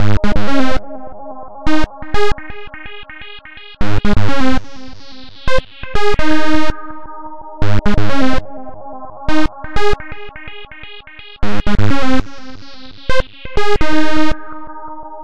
4 LFO acid loops at 126bpm
4 breaks of acid tones sequenced in Jeskola Buzz with Ohm Boys LFO Delay effect, perfect loop at 126 bpm.